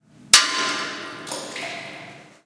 Pebble hits metal, then falls in water. Primo EM172 capsules inside widscreens, FEL Microphone Amplifier BMA2, PCM-M10 recorder. Recorded inside an old cistern of the Regina Castle (Badajoz Province, S Spain)
basement, cave, cistern, dungeon, echo, field-recording, reecho, reverb, reverberation, tunnel, underground, water